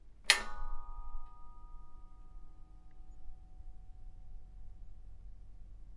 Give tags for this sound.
foley Metal metalfx metal-sound sound

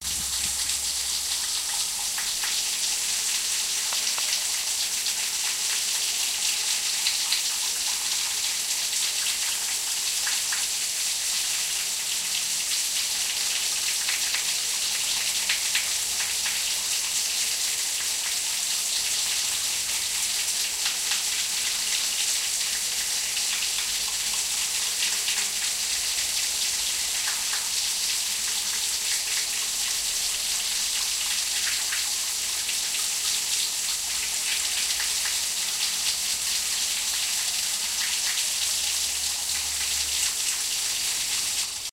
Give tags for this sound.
running water garbage flow bubbling drain rhythmic pipe sewer

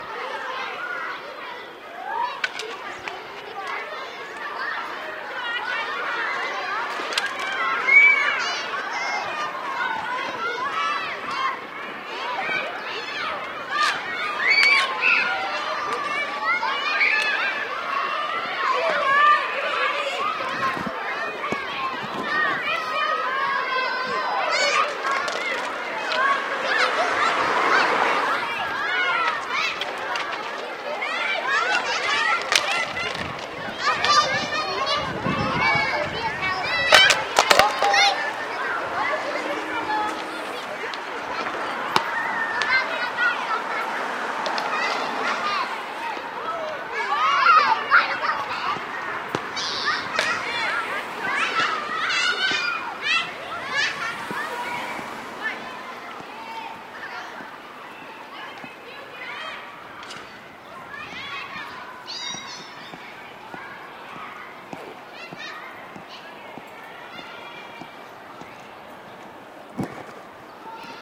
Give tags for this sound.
afternoon-recess dewson-street-Public-school kids school-yard street-hockey toronto

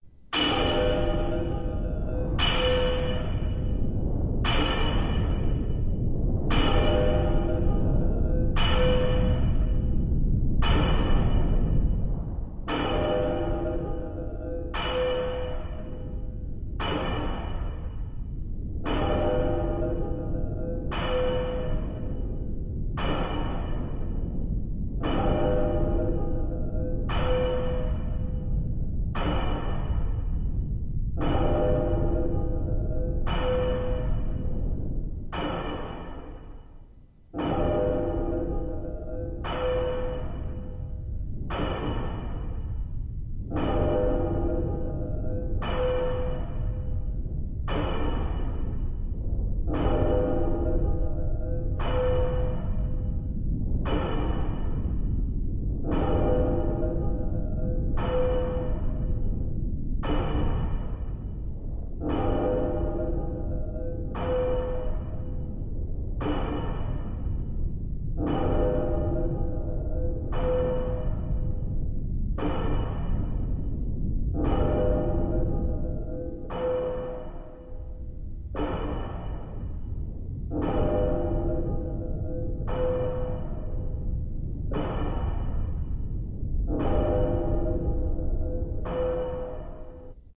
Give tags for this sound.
ambient drone factory freaky horror multisample soundscape strange

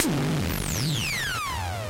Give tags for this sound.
tube tr-8 ch symetrix-501 metasonix-f1 future-retro-xs

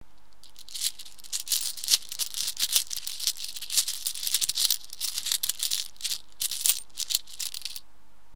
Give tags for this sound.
coins money

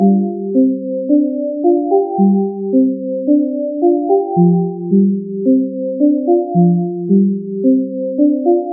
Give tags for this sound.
110bpm synth

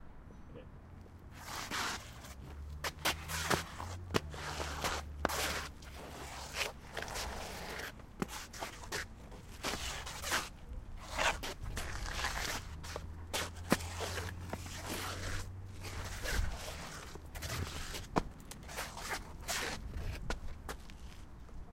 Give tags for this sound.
Crowd Feet Footsteps Group Shuffling Zombie

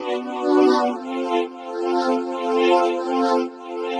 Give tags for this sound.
atmosphere melodramatic synth

